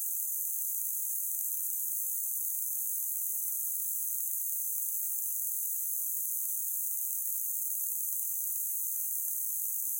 "Grey" noise, generated at 40 intensity in Audition.